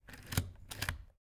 Sound of pressing and relasing self-inking stamp recorded using stereo mid-side technique on Zoom H4n and external DPA 4006 microphone
aproved, bank, certified, click, completed, down, letter, paper, post, press, relase, stamp, stamping, stationary, top-secret